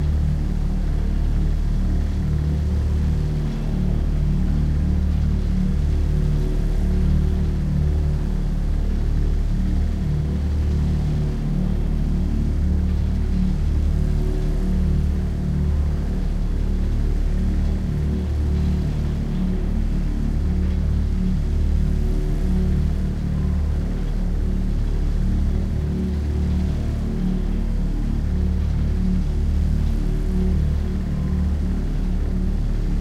The sound of a generator of a large vertical wind turbine in the open countryside in Germany. Suitable as background in games, film etc.